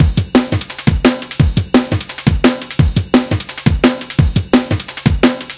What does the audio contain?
Spyre Hybrid Break 5